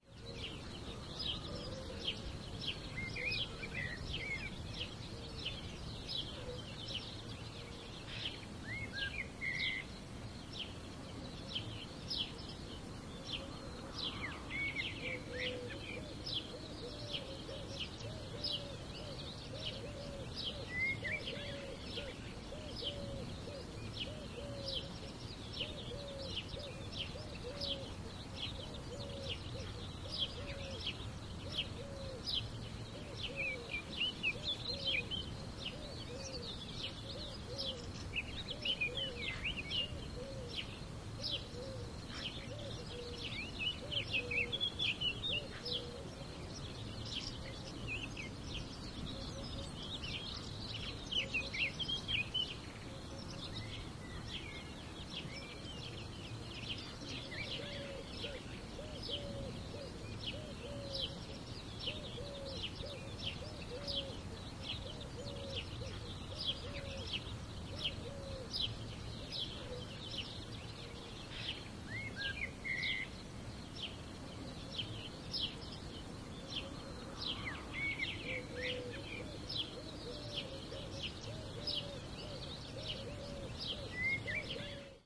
Many birds and a cuckoo singing in a quiet plain, during sunrise, in spring. Located in Gers, France. Recorded A/B with 2 cardioid microphones schoeps cmc6 through SQN4S mixer on a Fostex PD4.
cuckoo, day, ambiance, sing, morning, gers, birds, france, country